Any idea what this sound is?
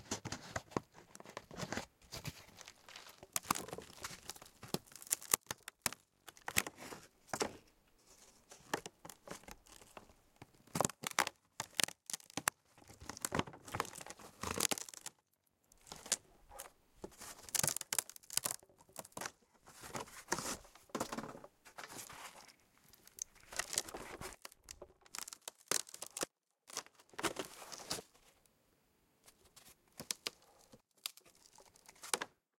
Wood panel board cracking snapping
Part of a series of sounds. I'm breaking up a rotten old piece of fencing in my back garden and thought I'd share the resulting sounds with the world!
cracking, wood, break, snapping, panel, plank, board, wooden